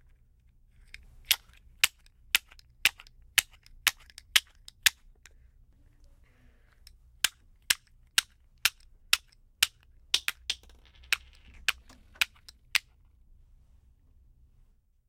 bottle cap
Som de tampinhas de garrafa batendo. Gravado em um microfone condensador de diafragma grande para a disciplina de Captação e Edição de Áudio do curso Rádio, TV e Internet, Universidade Anhembi Morumbi. São Paulo-SP. Brasil.